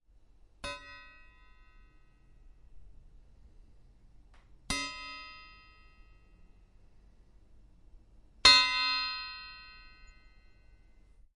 A knife being flicked with the fingernail. Sharp transient.